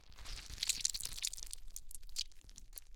rubber anti stress ball being squished
recorded with Rode NT1a and Sound Devices MixPre6